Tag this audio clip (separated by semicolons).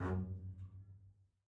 solo-contrabass; single-note; midi-velocity-63; f2